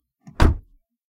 car door close v1 2
interactions, player, recording